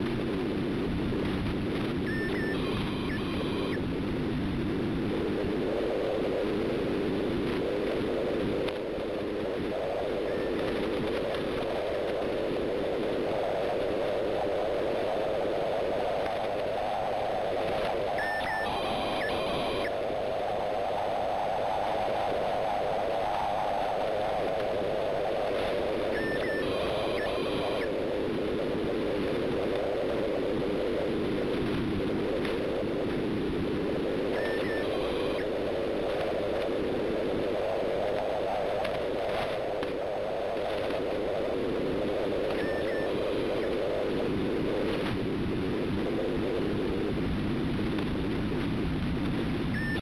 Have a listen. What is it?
SDR 2014-09-03 9645KHz pager Bleeps
This is one of multiple samples I have recorded from short wave radio, and should, if I uploaded them properly be located in a pack of more radio samples.
How the name is built up:
SDR %YYYY-MM-DD%_%FREQUENCY% %DESCRIPTION% (unfortunately I didn't get to put in the decimals of the frequency when I exported the samples T_T)
I love you if you give me some credit, but it's not a must.
noise, radio, SDR, Short-wave-radio